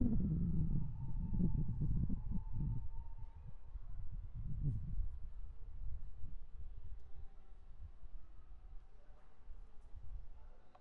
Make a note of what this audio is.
Uni Folie Wind
day, trees, wind, windy